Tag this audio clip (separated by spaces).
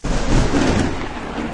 thunder loops